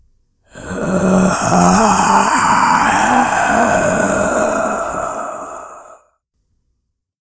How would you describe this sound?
This clip contains a single zombie groan. This was created using audacity.